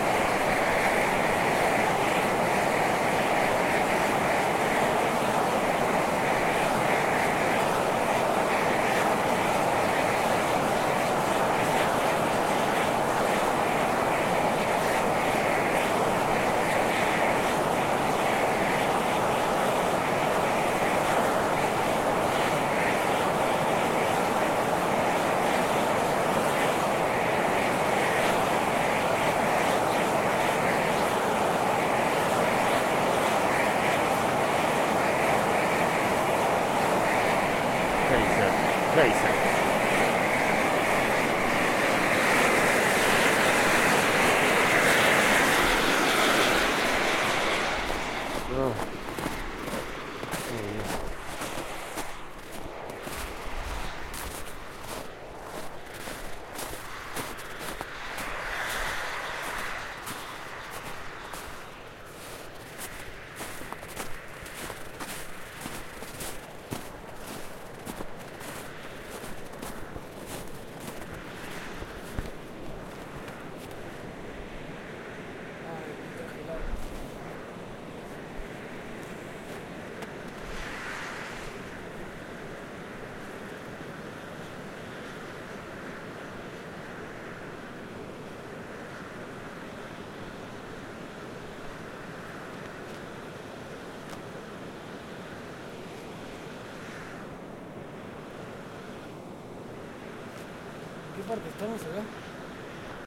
Geiser - Iceland
A geiser, so a lot of noise